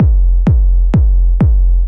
BD SaturatedBigRoom G 128
Big room type kick drum created by processing a recording of filter self-oscillation from roland sh-101.
drumloop, tuned, bigroom, house, drum, bassdrum, bass, loop, 128bpm, kick, bd